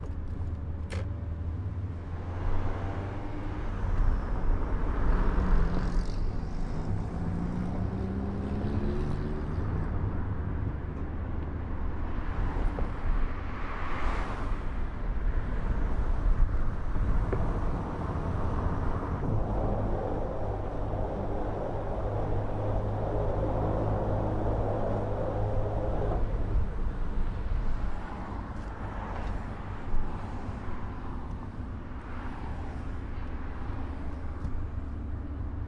traffic leading to the hum of a steel grated bridge deck
The bridge is the Johnson St. Bridge in Victoria, BC, Canada, which is currently being prepped to be replaced.